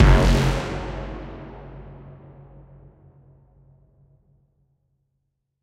Made with processing and playing around with a rachet, works quite well in reverse.